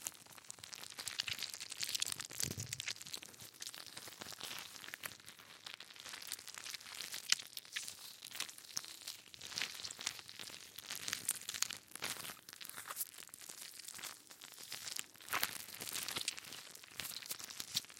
rubber anti stress ball being squished
recorded with Rode NT1a and Sound Devices MixPre6
blood, bones, brain, flesh, foley, goo, gore, gross, horror, horror-effects, mush, slime, splat, squelch, squish, wet, zombie